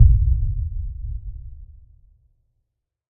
ExplosionBombBlastDistantMuffled LikeCinematicBoom 3
Lots of lows, perhaps inaudible on small speakers. Not quite so "ringy" or gong-like as the original Cinematic Boom, and perhaps better because of that fact. This one is more heavily filtered, still relatively granular. Created within Cool Edit Pro.